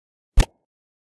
Toy, Toy button, Press.